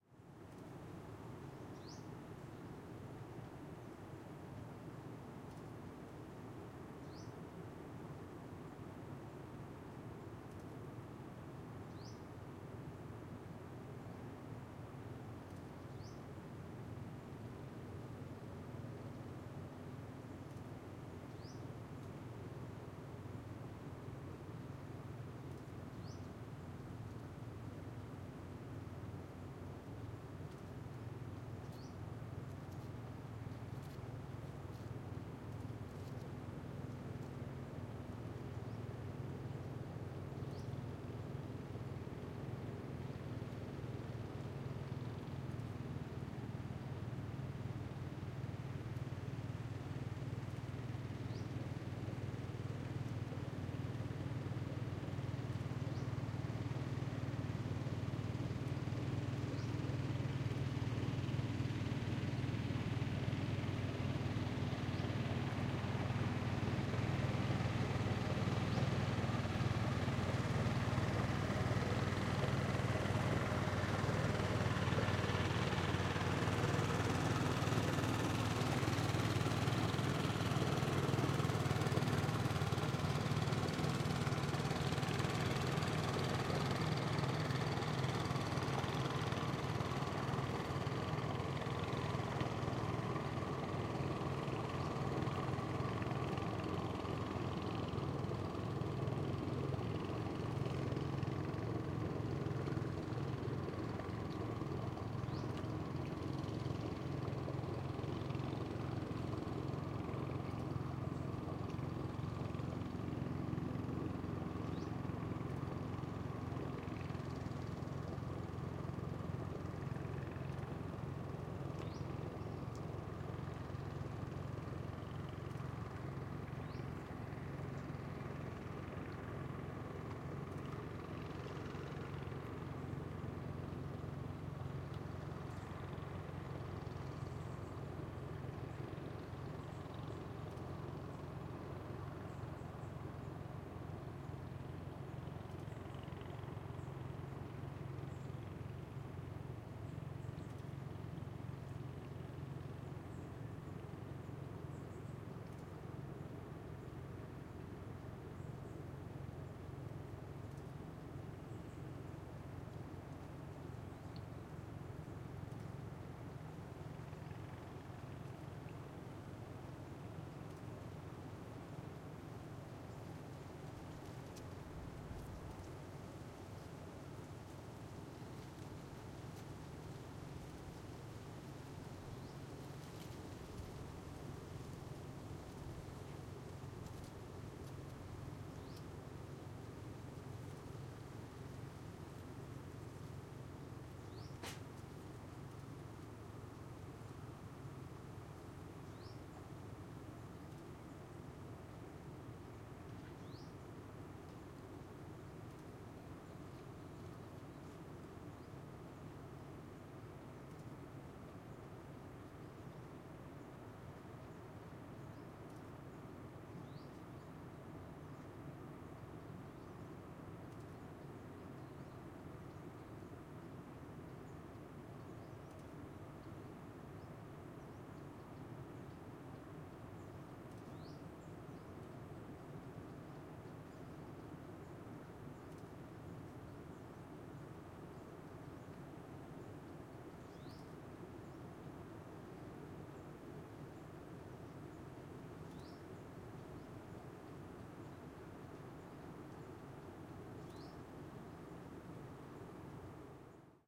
engine boat passing by
Slow boat engine passing by, Mekong river.